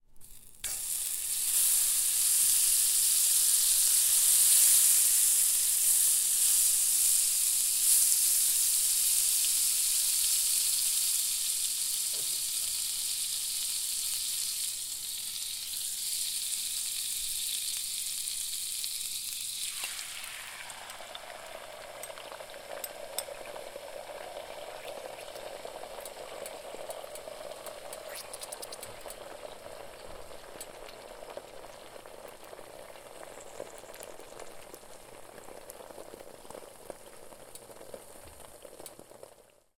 cooking - egg - butter in skillet & eggs being scrambled
Putting butter in a hot skillet, then pouring in beaten eggs to make scrambled eggs.
butter, cooking, egg, eggs, fry, frying, scrambled, scrambled-eggs, skillet